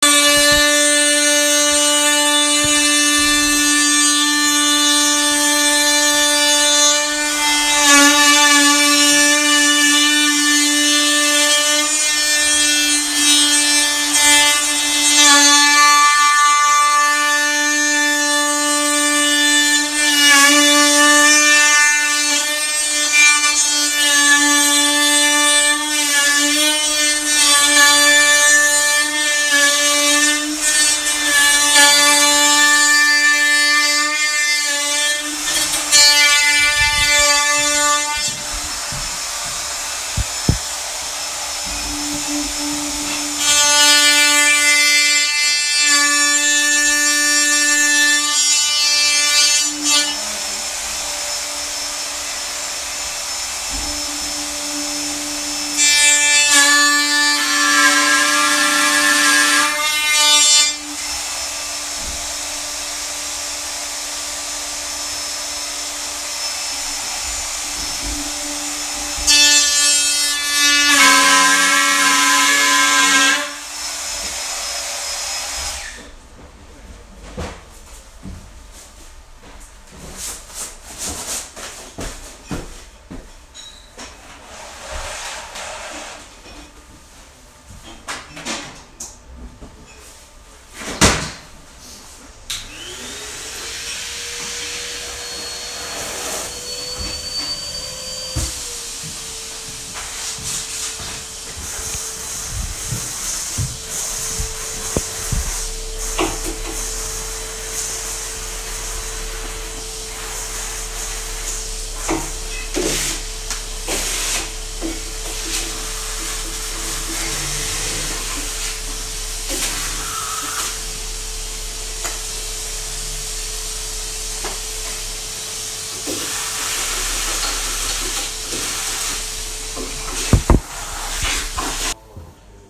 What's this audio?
iphone recording of wood milling a door